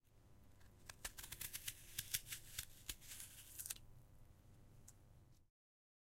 Cutting an Apple in pieces, with a knife.